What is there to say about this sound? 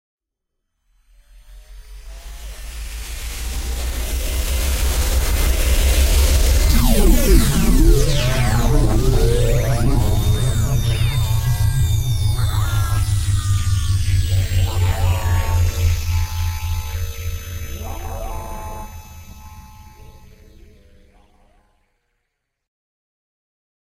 Fire huge lazer
Original description ::: "Fire is also a Verb, This is a huge lazer charging up and being "Fired"Created with Reaktor, Logic EXS24 and ES2, many layers of different synths automation and then run through the Logic time stretcher."